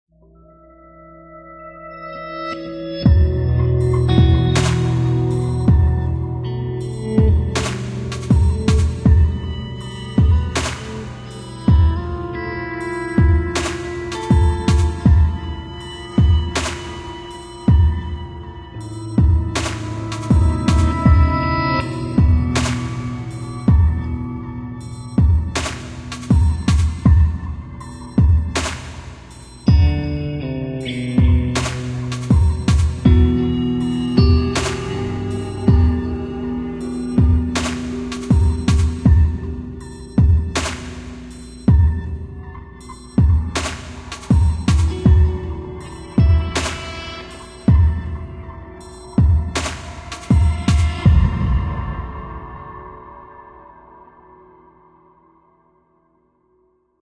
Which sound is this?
loop meditations mix 2
hotter drum loop and overall level